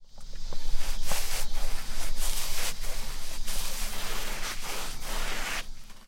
Paint Brush 2
Paint brush sounds
Brush, Paint